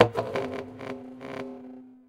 sax effect
sax band filtered sample remix
transformation, sax, effect